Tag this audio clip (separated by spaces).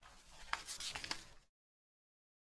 Paper Foley